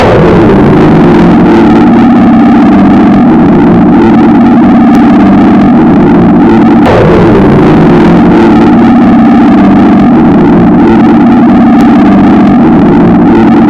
Distorted sin wave scream 2 [LOUD]
dark, distorted, distortion, experimental, flstudio, gabber, hard, hardcore, loud, noise, noisy, processed, sfx, sine, vst